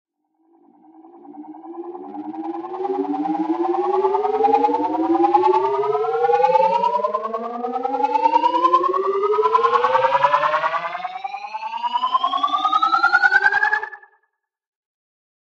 I was playing a bit in LMMS and I came up with a few risers